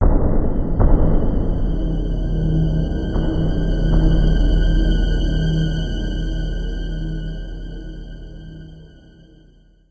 Suspense Strings 001
An eerie sound effect that can be used in movies or games to spawn a sense of fear into the listener. Made with FL Studio 10
Enjoy!
scary, stinger